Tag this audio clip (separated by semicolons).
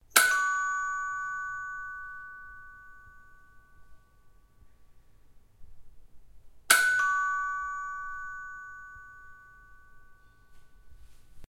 bell; casa; door; house; puerta; Timbre